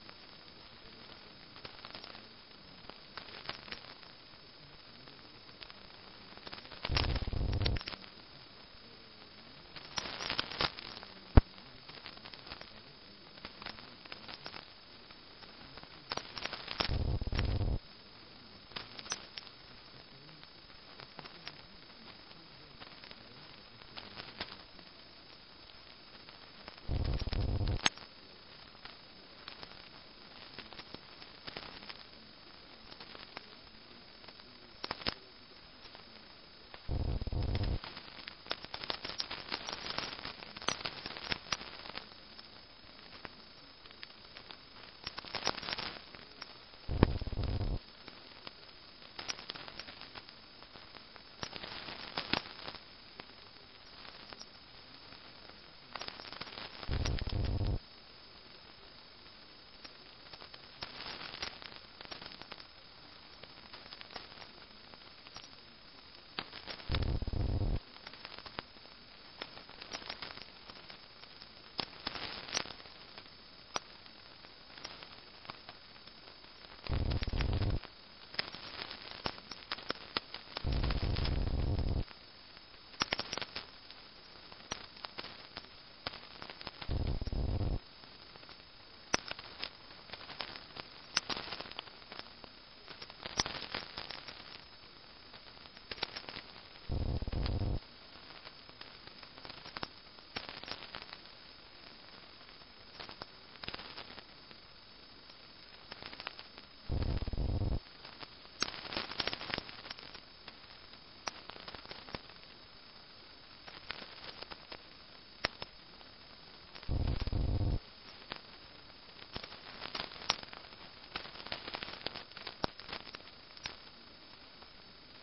Static from lightning 126.0kHz
Recorded from 126.0KHZ. Shortwave can also have sounds from lightning just like regular AM radio during a thunderstorm. On this particular frequency, it was a little quiet, but you could hear the static from the lightning and some other transmission that was faint. Whatever it is on the Frequency, it's always quiet like that, which could be really good for recording things like tracking lightning strikes. recorded from the webSDR from Twente The Netherlands
silence, shortwave-radio, WebSDR, noise, quiet, static, Lightning